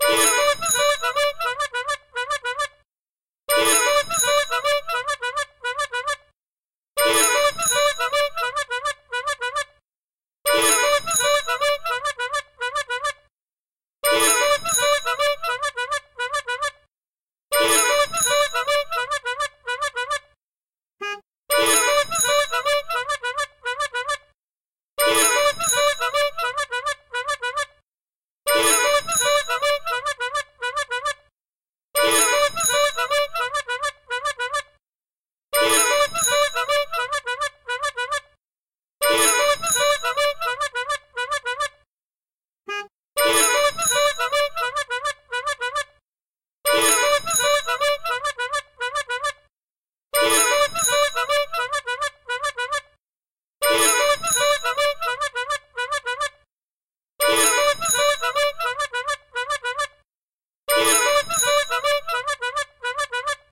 listen for the tiny beeps :BY TALLULAH ABERNATHY